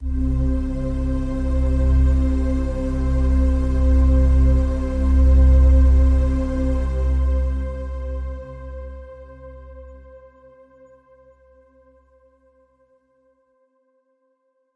KRUCIFIX PRODUCTIONS CINEMATIC SOUND SADNESS 2018
music scoring for movies
film, processed, atmosphere, movies, theatrical, music, dark, cinematic, scoring, ambience